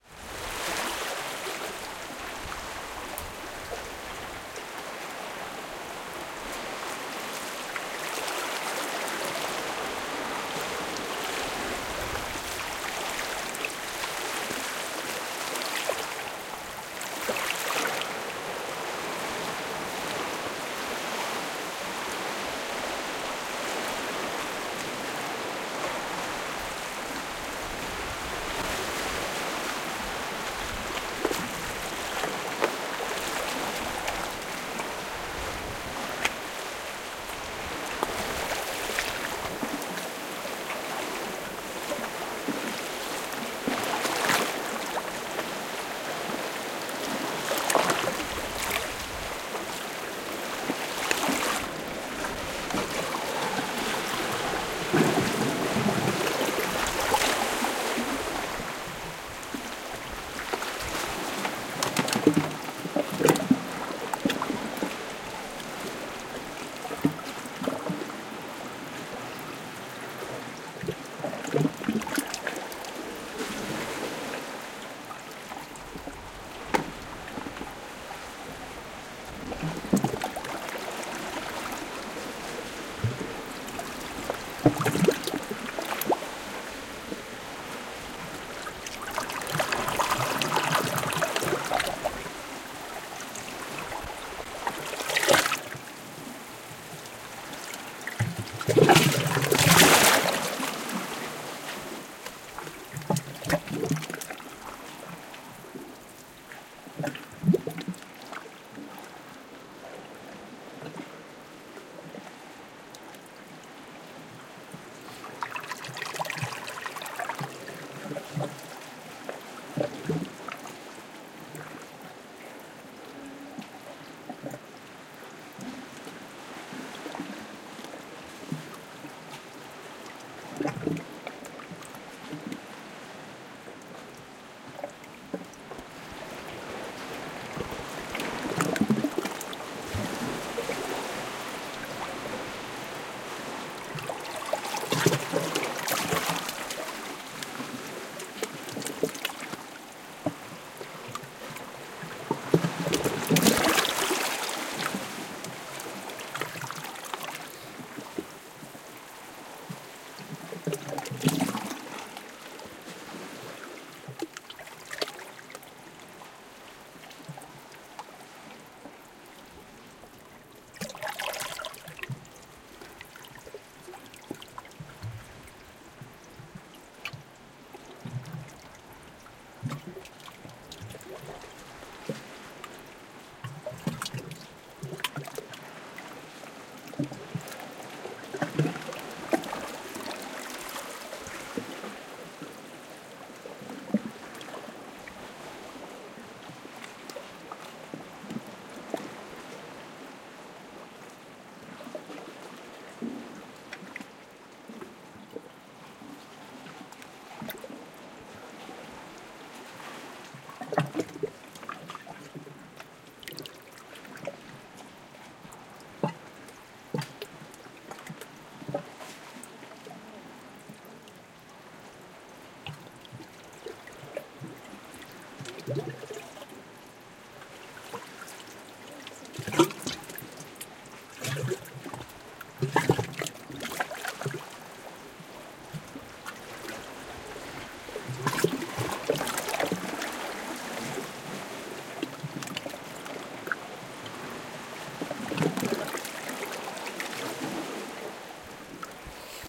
Sea (lapping)
Field-recording, Lapping, Sea, Waves